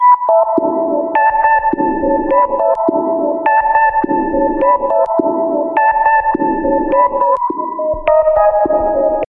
Little Dress
sweet, pad